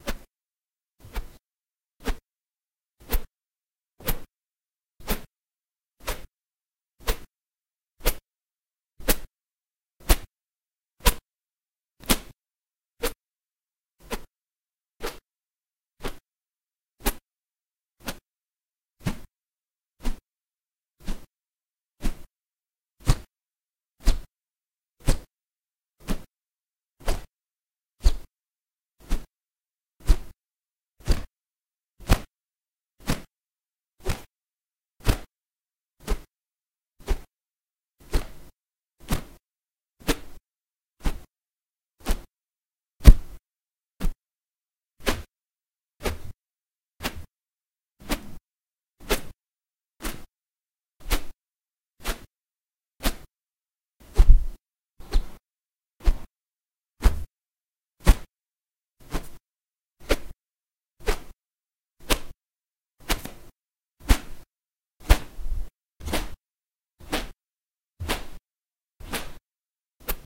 swishes with feather
I batted a feather of a crow and recorded it via computer with the microphone Samsong C01U and Ableton Live.
There are more windy swooshes and swishes with high pitched bits in them.
Sadly mono.
floth, c01u, air, swish, swosh, wind, flooth, feather, wosh, swoosh